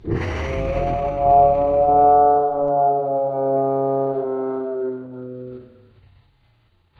Created entirely in cool edit in response to friendly dragon post using my voice a cat and some processing.
animal, cat, dragon, processed, voice